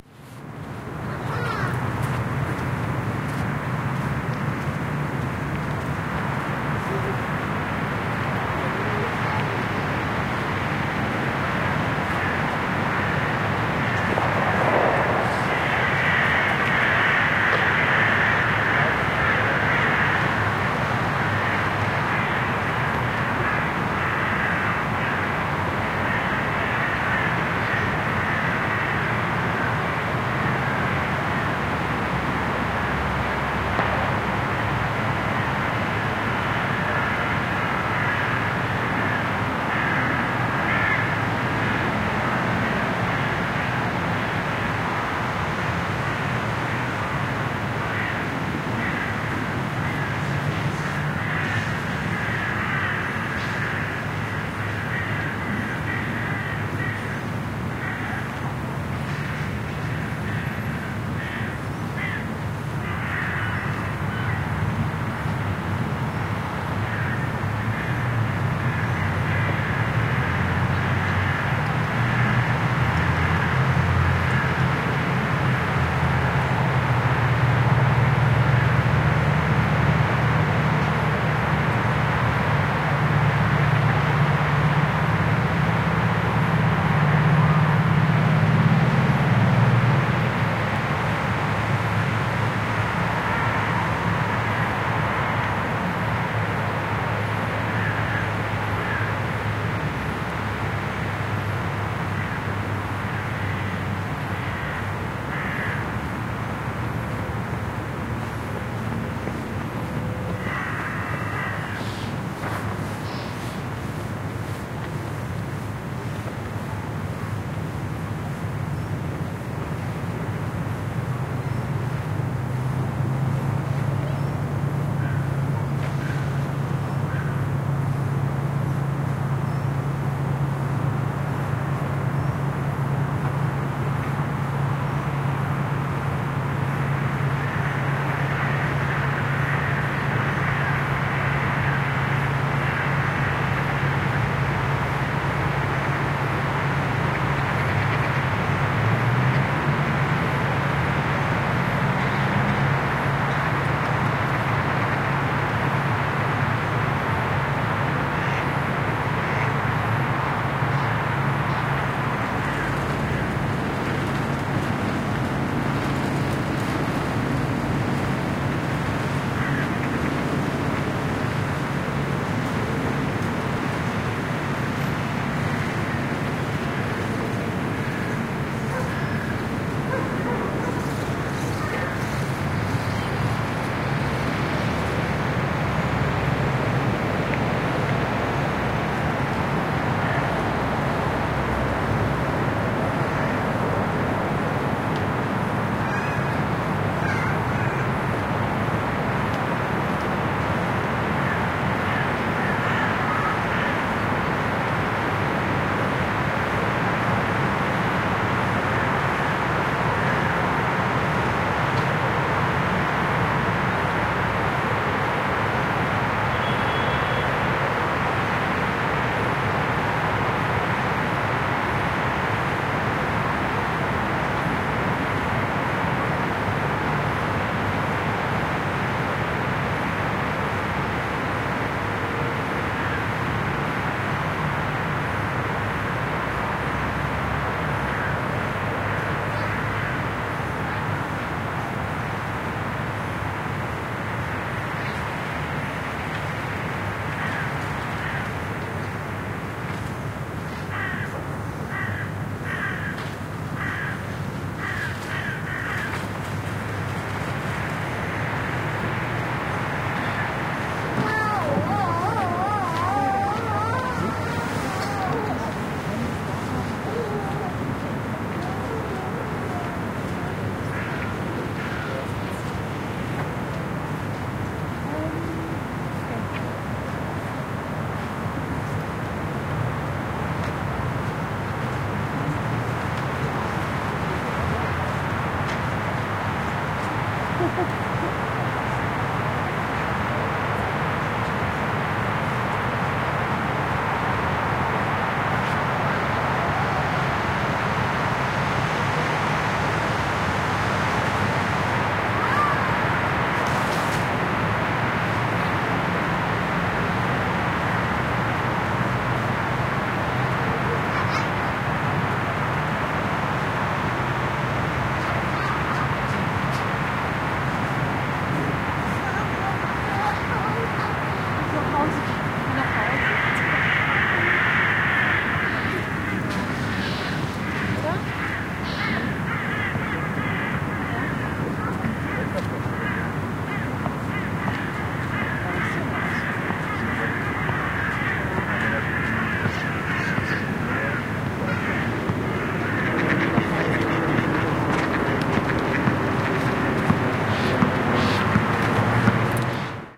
Atmo Mainspielplatz in the afternoorn (December)
Calm backround near a children's playground close to the river Main on December 31st 2019 at about 4 or 5 pm. The traffic situation with cars, motorbikes and busses is extensive though.
Sometimes you can hear the kids' voices from around 80 metres, sometimes few steps away. Few times a woman speaks something and from time to time theres a man talking, too. Sometimes there's a subtle squeaking of a swing in the backround.
The most remarkable feature is the discontinuous, yet recurring flock of ravens (or crows?) clarking.
At the very end some ducks join the scene.
Recorded with a portable stereo recorder.
children, crows, raven, suburban, urban, playground, ambience, birds, city, kids, field-recording, backround, backsound, ambiance, traffic